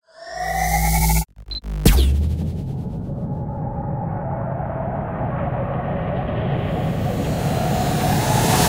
The spaceship launches into a brief warp speed, accompanied by a synth piece.
spaceship, sci-fi, science-fiction, atmosphere, synth, futuristic